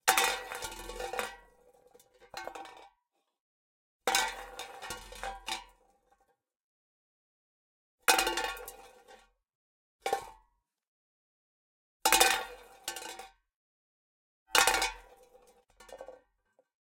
Studio recording of a medium sized empty tin metal can falling and rolling over concrete floor.
GEAR:
Oktava MK-012
Orion Antelope
FORMAT: